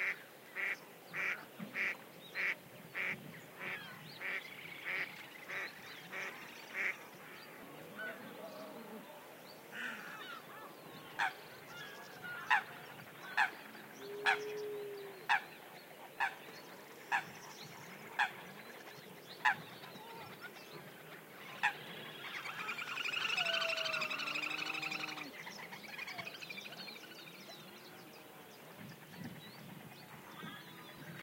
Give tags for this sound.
birds digital-watermark enscribe field-recording nature south-spain steganography

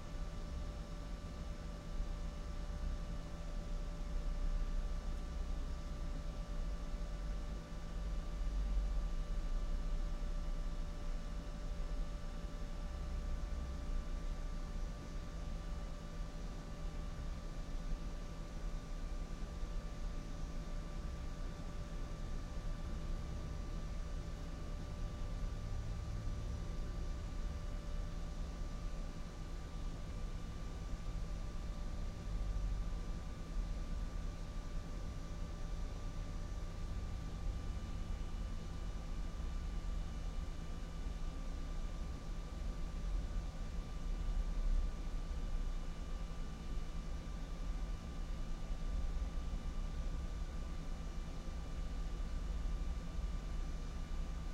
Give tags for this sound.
ambiance computer environment foley noise office room room-tone whine whirr

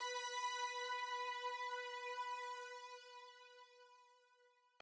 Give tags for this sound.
Pad synth Hit B Music-Based-on-Final-Fantasy Sample

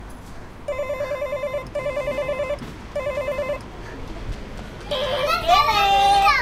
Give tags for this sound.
ausiasmarch cityrings sonicsnap spain

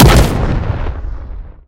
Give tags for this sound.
explosion monster shockwave

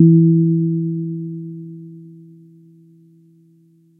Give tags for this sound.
electric-piano multisample reaktor

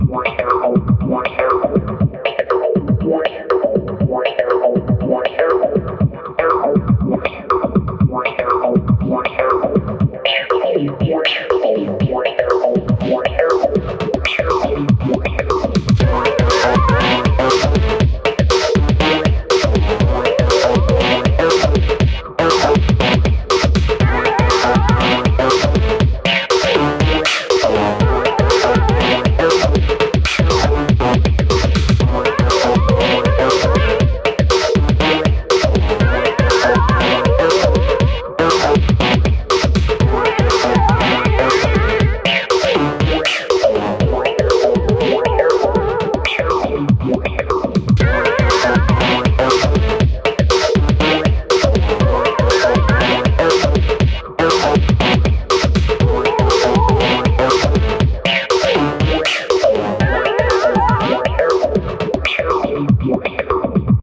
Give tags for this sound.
future soundeffect digital electronic glitch game-sfx loop freaky free-music electric sci-fi lo-fi effect fx sfx noise abstract machine sound-design

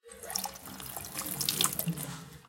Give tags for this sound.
agua fountain fuente paisaje-sonoro soundscape UEM Universidad-Europea-de-Madrid water

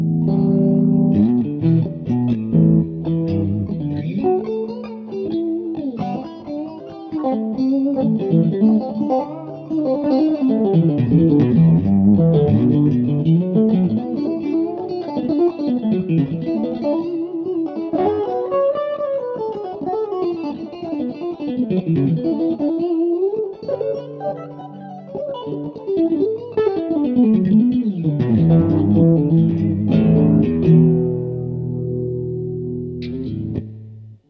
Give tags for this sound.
blues fender guitar guitar-jam jam riff srv s-r-v-wanna-be-2 stevie-ray stratocaster vaughan

